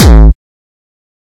Distorted kick created with F.L. Studio. Blood Overdrive, Parametric EQ, Stereo enhancer, and EQUO effects were used.
bass, distortion, drum, hard, hardcore, kick, melody, progression, synth, techno, trance